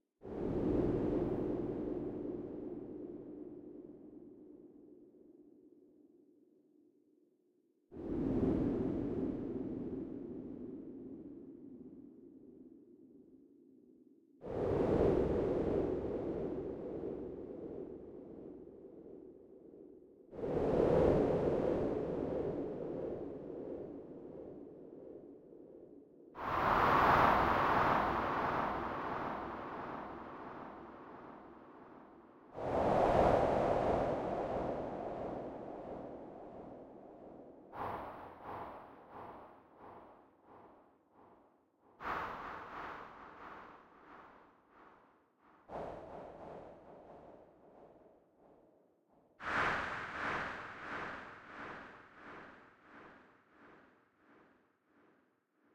Whoosh Epic
This sound reminds me of a Radiohead - Kid A sound effect. Could be used for some whoosh, or desolate planet.
woosh, radiohead, wind, breeze, swoosh, air, epic, planet, space, kid, a, desolate, whoosh, desert, swish, sound, mars, blowing